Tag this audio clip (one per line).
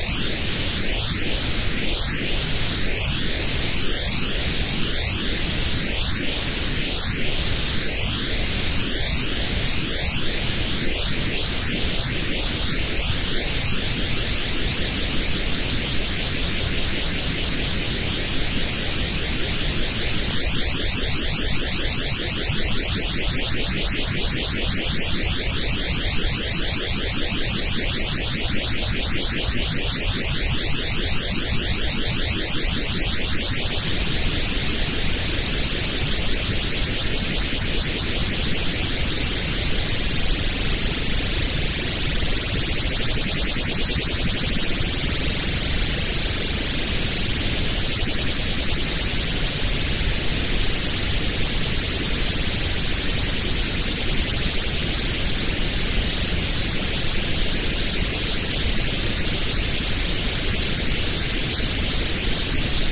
flanger
modulation